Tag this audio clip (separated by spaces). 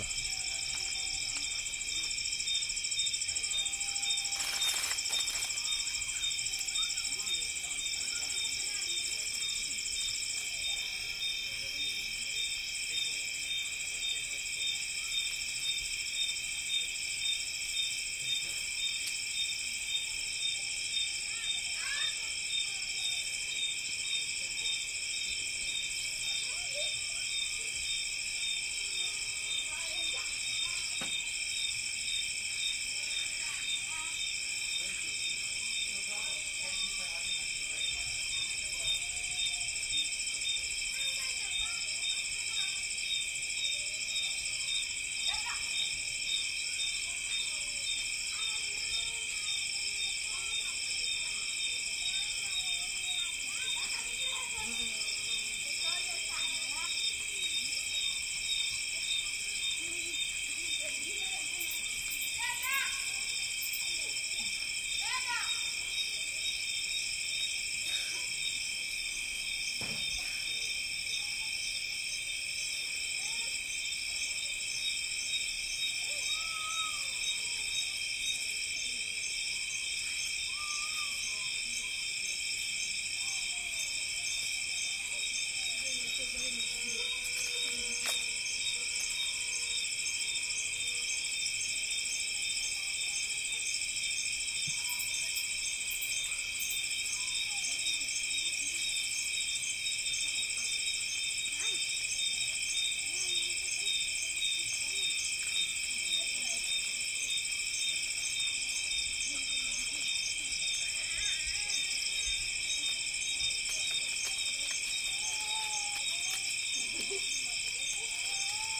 Uganda birds crickets field night